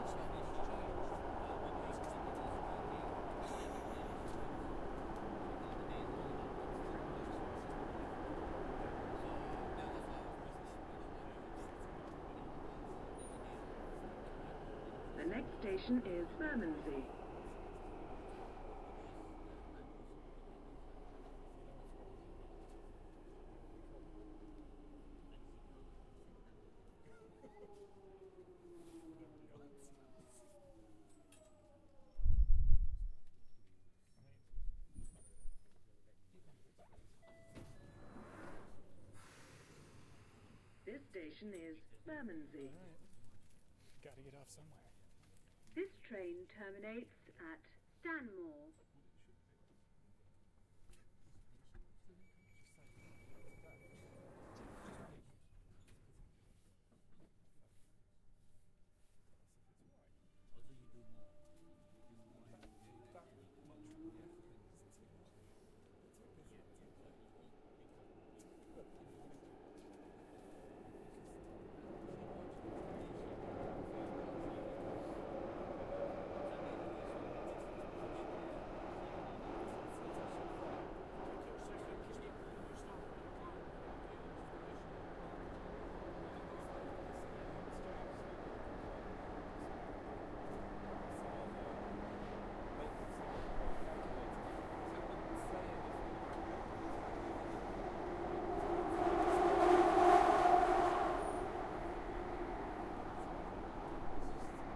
London Tube Jubilee Line, Awful Sound at 100 Seconds
Frequent Tube riders may be familiar with the hum that happens between two stops, (I can't remember which two.) For a moment, it gets a little too loud and freaks you out (around the 1:40 mark). The rest is just Tube ambience. Recorded in stereo on a Zoom H4n.
underground, line, london